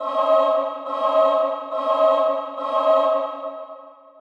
Dark Choir 01
Choir made in Fruity loops plugin Sytrus and Reverb.
Dark, Choir, Voice